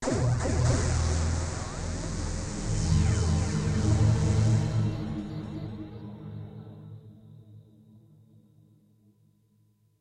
EFX sound created by Grokmusic on his Studios with Yamaha MX49
alien-sound-effects, Futuristic, Search, Sound-Effects